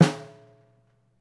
Part of "SemiloopDrumsamples" package, please dl the whole package.. With 'semilooped' I mean that only the ride and hihat are longer loops and the kick and snare is separate for better flexibility. I only made basic patterns tho as this package is mostly meant for creating custom playalong/click tracks.
No EQ's, I'll let the user do that.. again for flexibility
All samples are Stereo(48khz24bitFLAC), since the sound of the kick naturally leaks in the overheads and the overheads are a big part of the snare sound.